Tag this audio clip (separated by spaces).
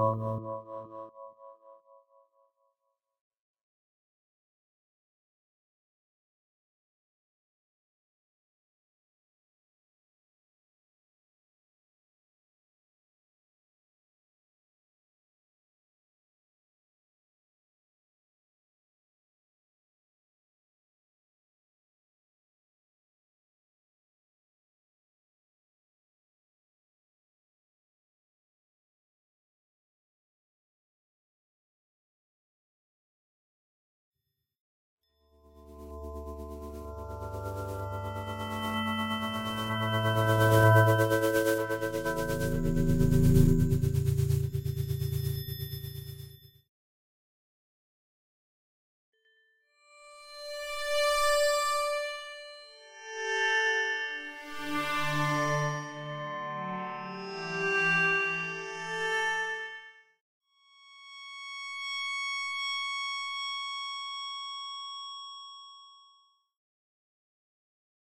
pure sun synthetic upper-mid